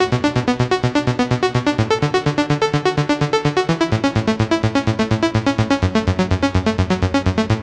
126-synthpop-arpeggio-loop
1 loop 4 you with = synthloop :) 126bpm
arpeggio, synth, synthpop